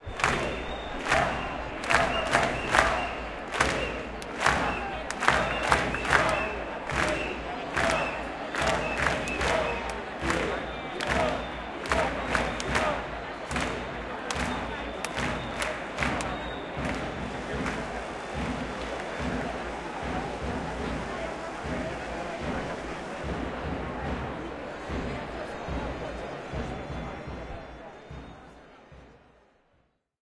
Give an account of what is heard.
11 septembre 2021 crits independencia 3
11, manifestation, independencia, septembre, crits, 3, 2021